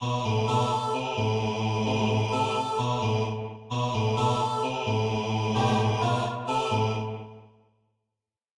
Choir loop my score
Made by FL Studio +FXs
choir, tragedy, solo, trailer, soundtrack, booming, dramatic, dynamic, sountracks, cinematic, epic, movie, loop